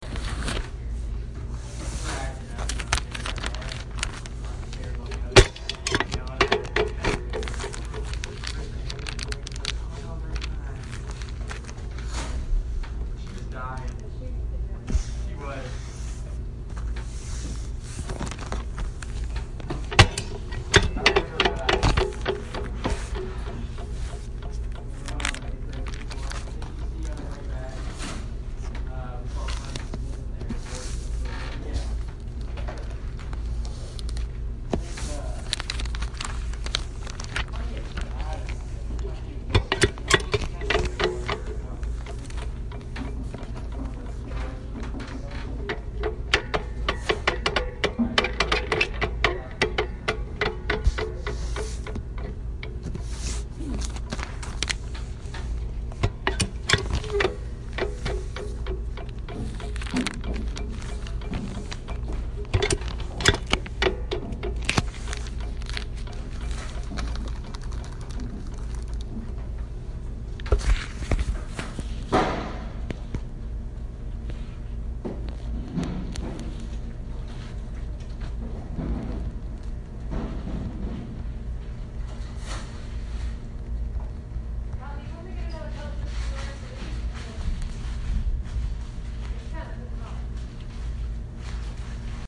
Bag Sealing Machine
This is a small machine at my workplace’s packaging department that heats up very quickly in order to close bags without a premade seal on them. You can hear the rustle of the bag and then the sealer clamp down on it. A few times I let it rattle and then die down, since it does that if you press really hard and then let go. You can also hear some distant talking from my colleagues.
machine, clamp, Rattle